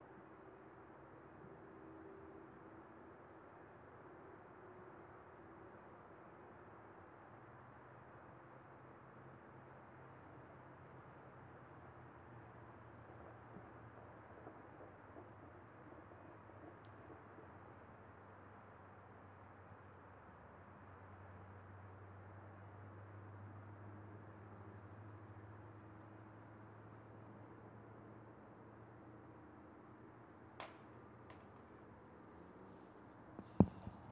outside ambience during the daytime
cars, outside, daytime